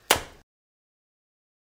Meat Slap 2
Meat Slap Guts Fall
slap, meat, guts